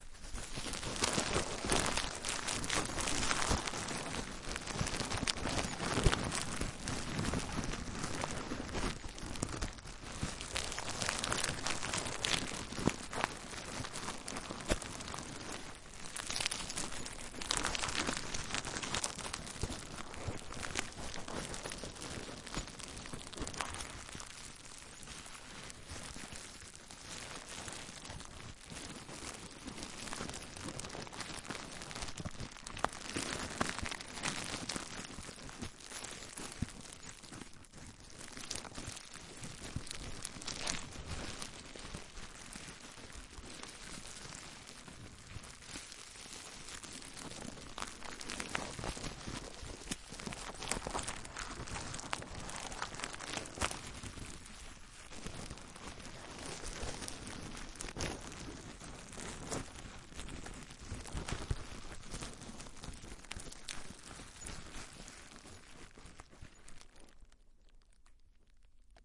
Packing Tape Crinkle Close
Ambient scrunching of packing tape, close to the mic. Stereo Tascam DR-05
asmr, crinkle, packing-tape, stereo, tape